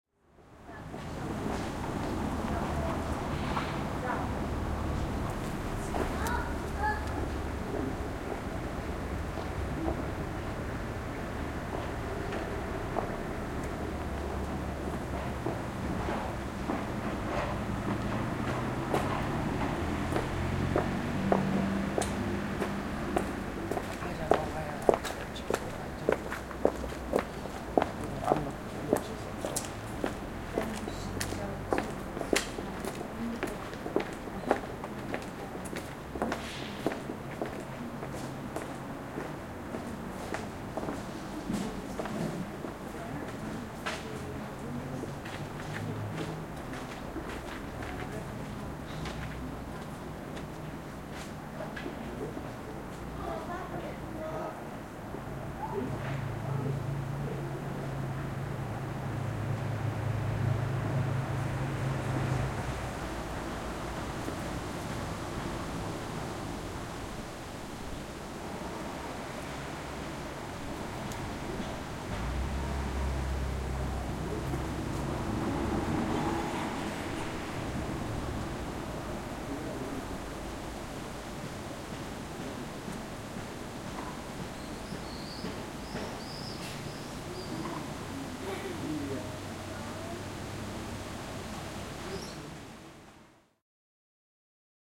Pikkukaupunki, jalankulkijoita / Small town, Porvoo, 1990s, pedestrians, footsteps, talking, hum of voices, distant traffic
Porvoo, 1990-luku. Kävelykatu, jalankulkijoita, askeleita ja sorinaa. Kauempana paikoin liikennettä.
Paikka/Place: Suomi / Finland / Porvoo
Aika/Date: 04.07.1995
Yleisradio, Footsteps, Town, Soundfx, Suomi, Field-Recording, PIkkukaupunki, People, Yle, Finland, Ihmiset, Small-town, Street, Askeleet, Steps, Tehosteet, Katu, Finnish-Broadcasting-Company, Kaupunki, Sorina, Talk